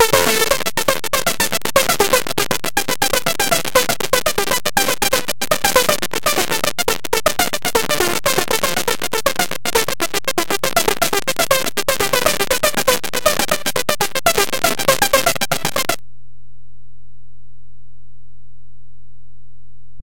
ARPS C - I took a self created sound from Gladiator VSTi within Cubase 5, played some chords on a track and used the build in arpeggiator of Cubase 5 to create a nice arpeggio. Finally I did send the signal through several NI Reaktor effects to polish the sound even further. 8 bar loop with an added 9th and 10th bar for the tail at 4/4 120 BPM. Enjoy!